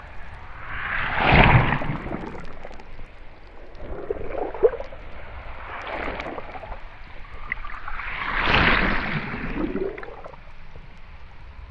bolivar waves underwater
waves at bolivar recorded underwater with a hydrophone